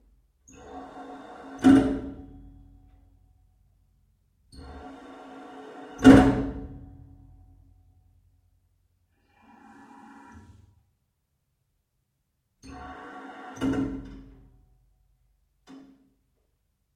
Water Hammer 01
Short water hammer from my kitchen sink. Mic was placed under the bathroom sink to give a distant perspective.
CAD E100S > Marantz PMD661.